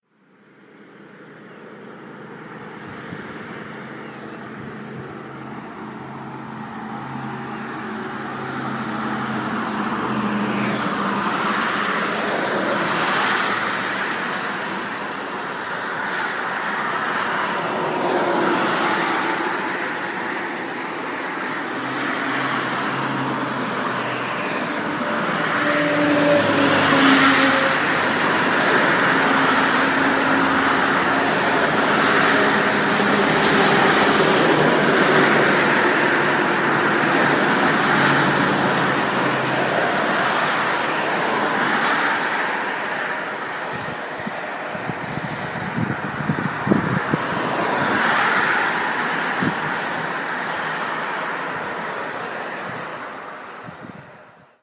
Road Noise 1
Busy motorway in city
Busy-highway, Cars, Drive, Highway, Motorway, Passing, Road, Transportation